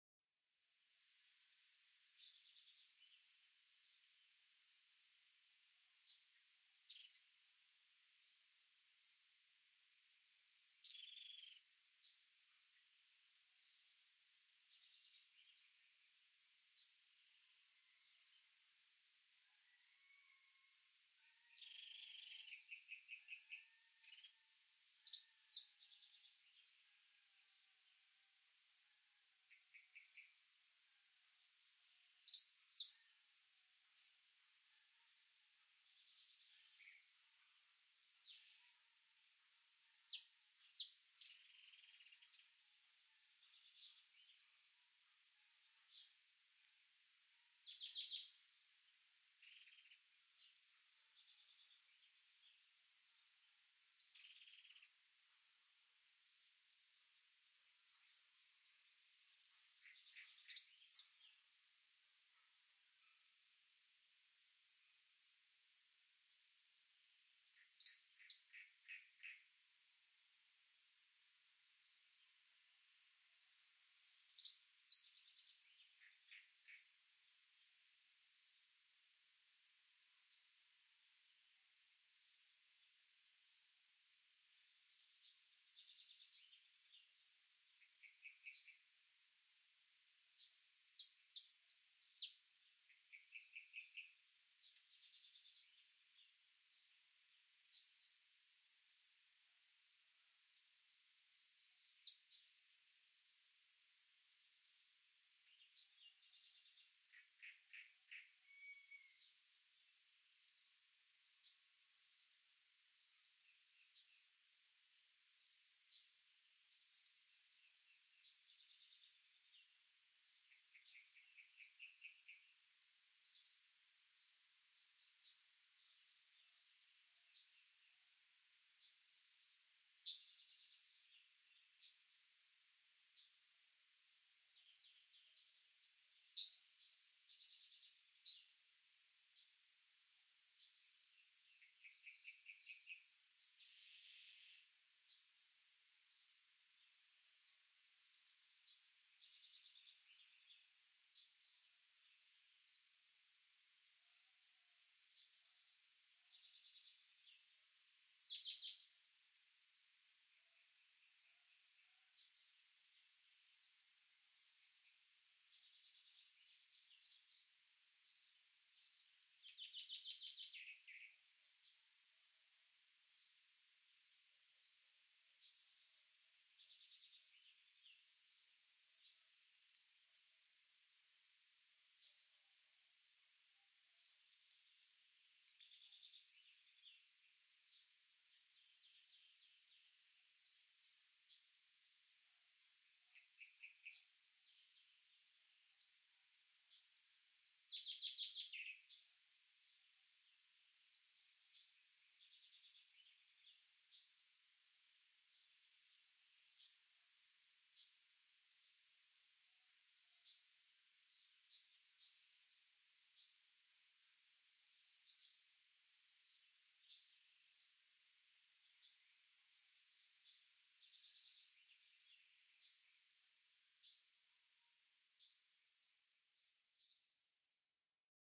Sound of Birds / Sonido de aves pajaros.
Birds from Patagonia Argentina / Pajaros de la patagonia de Argentina.
BIRDS, NATURE, PAJAROS, AMBIENTE, AMBIENCE, NATURALEZA